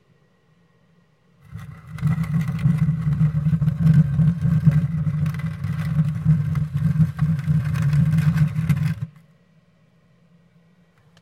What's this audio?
stone on stone ST
dragging a sandstone over a similar stone